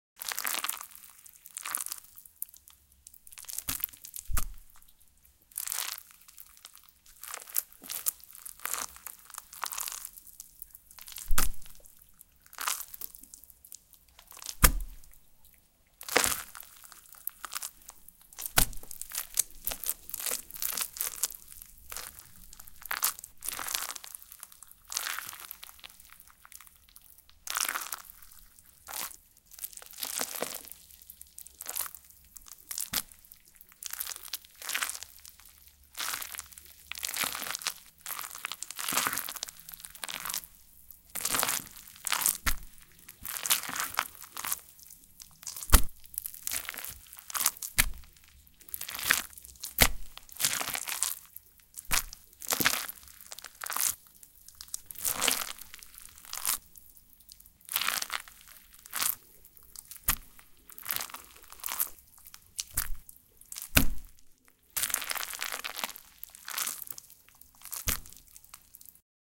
Ripping slimy squelching flesh(comp,lmtr,dns,Eq,lmtr)
This sound can be useful for sounding the effects associated with such non-humane acts as tearing flesh and actions like this. In fact, here you only hear the sounds of digging in a plate with mushrooms boiled for grinding in a meat grinder. The mushrooms were freshly picked. Yummy. Thus, not a single living thing was harmed during this recording. My stomach too.)))If it does not bother you, share links to your work where this sound was used.
arm, cinematic, crack, crunch, effects, film, flesh, gore, leg, movie, neck, sfx, slime, squish, suspense, tear